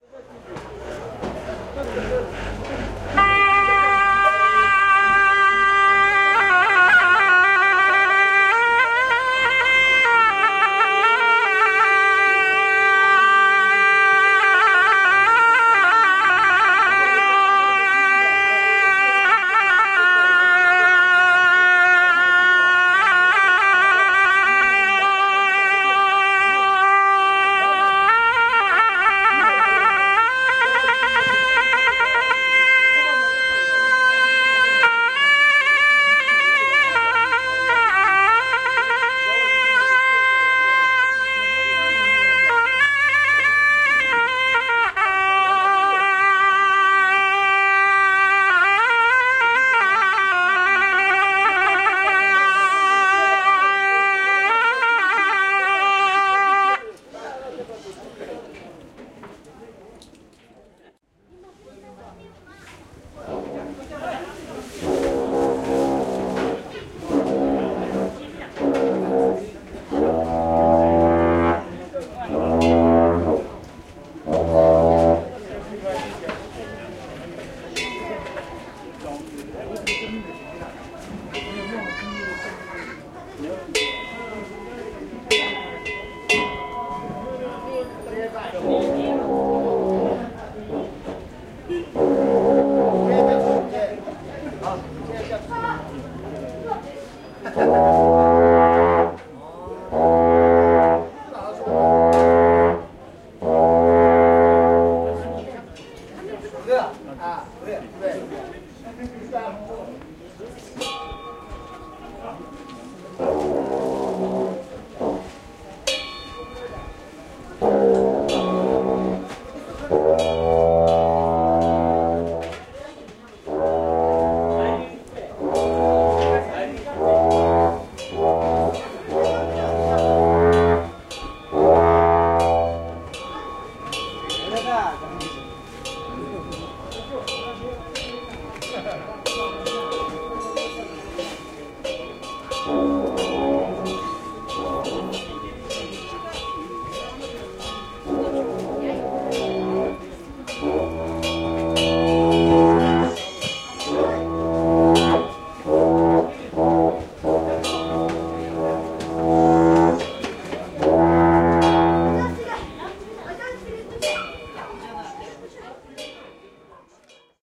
Thimphu Musical Instrument Market - Bhutan
At the weekly bazaar in Thimphu all manner of goods are available including produce, yak meat, dried cheese on a string. From a particular stall one can hear a merchant playing a traditional horn (lingm) to attract business. An Abbot from a local monastery then tries out a percussion gong instrument for potential use at his monastery.
Mini-disc
horn, Thimphu, music, musical, lingm, gong, monk, percussion, Bhutan, Buddha, flute, drum, market, bazaar, Asia, instrument, abbot, rigsar